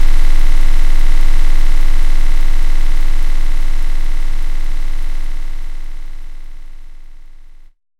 03 D0 sine freehand
Some D0 18.354Hz sine drawed in audacity with mouse hand free with no correction of the irregularities, looping, an envelope drawed manually as well, like for the original graphical Pixel Art Obscur principles, except some slight eq filtering.
noise, d0, lo-fi, sine, audacity, waveform, handfree, harsh, computer, sine-wave, glitch, experimental, electronic, beep, mouse